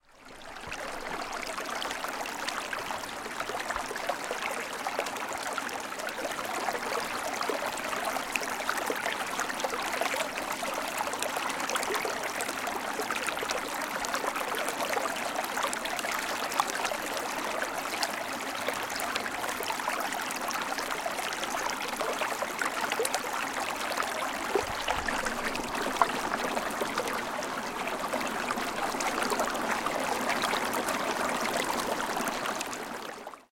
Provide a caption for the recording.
Water Stream-Flowing 06
A selection of nature sounds.
babbling, brook, creek, field-recording, flow, flowing, gurgle, liquid, nature, outdoors, stream, water, wet